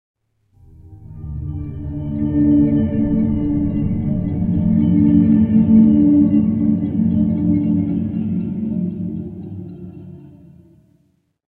Yet another convoluted sample made by me. Trying out some convolution on short samples. The sound source is midi and before convolution I mixed some homemade melodies destined to meet unrecognized grounds.
audio, Convoluted, flyby, supernatural, whoosh, sweep, ambient